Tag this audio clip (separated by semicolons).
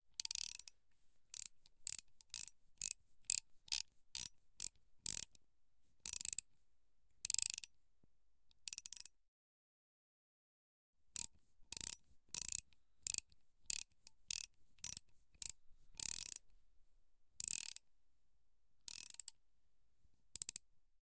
Panska; screwdriver; CZ; Pansk; Czech